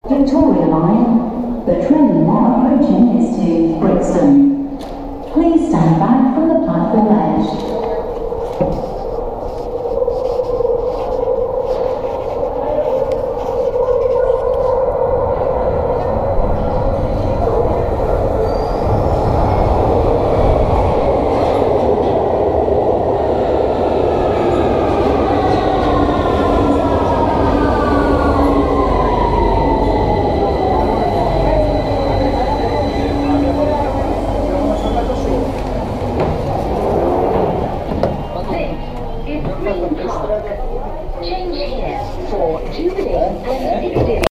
Victoria line announcement Train Approaching to Brixton on my vlog, filmed on a Gopro 4
Announcement, Approaching, Brixton, Line, Platform, S, Subway, Underground, Victoria